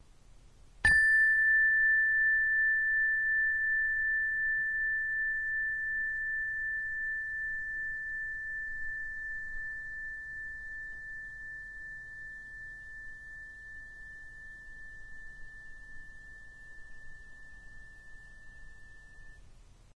a "zen" meditation bell as it fades all the way out.
bell, meditation